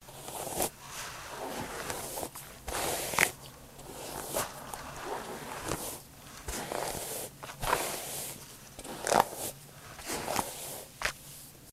A sound effect of a brushing hair